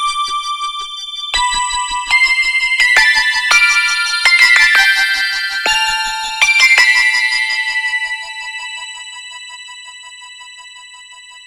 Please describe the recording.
Micron Sounds Pack
Acid Like Bass
Random Synthy Sounds . .and Chords
and Some Rhythms made on the Micron.
I'm Sorry. theres no better describtion. Im tired
acid, alesis, ambient, base, bass, beats, chords, electro, glitch, idm, kat, leftfield, micron, synth